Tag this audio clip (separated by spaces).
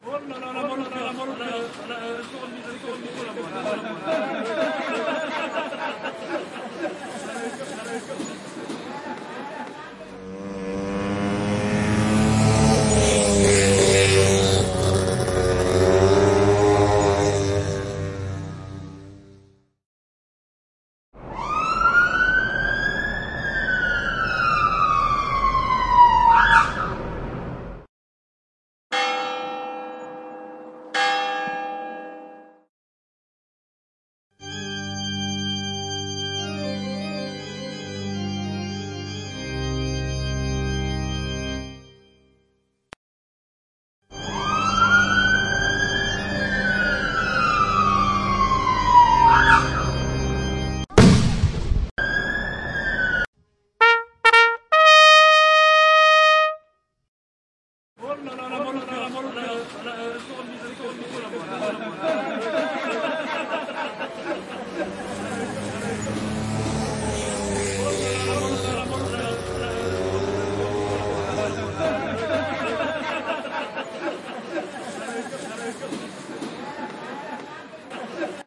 monster organ crowd people FBI voices